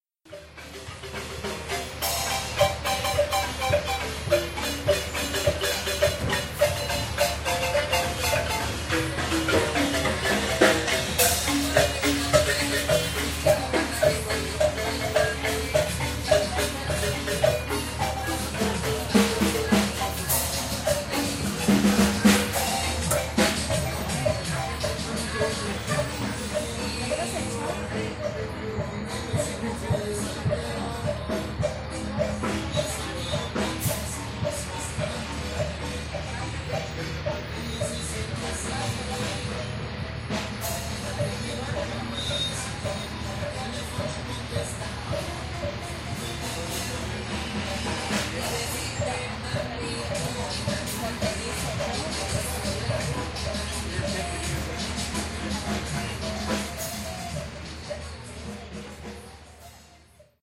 street music
Grabación de músicos urbanos realizada al pasar por calle concurrida de Coatepec, Veracruz, México. / Recording of urban musicians performed as i pass through the busy street of Coatepec, Veracruz, Mexico.
marimba, urban-ambiance, street-sound, urban-mix-sounds, street-music, mexico, musicians